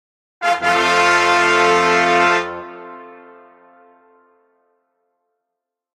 TaDa! sting composed of trumpets, trombones, french horns, trumpet section in Garageband.